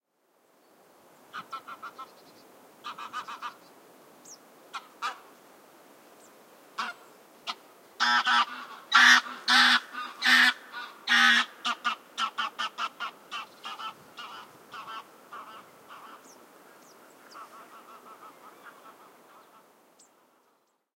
A goose passes in front of me and you will here it. I did some recordings at Målsjön in Kristdala Sweden, it`s a bird-lake. It´s done in 2nd of april.
microphones two CM3 from Line Audio
And windshields from rycote.
bird-sea, ambience, ambiance, birds, flying, birdsong, general-noise, field-recording, goose, spring, ambient, nature, geese, bird, wings, Sounds